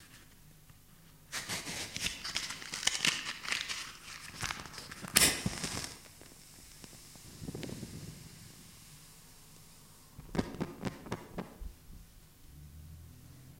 Striking a match 2
Striking a match. Recorded on MD with dynamic Microphone. Little Roomverb.
matchbox, match, striking, burning-flame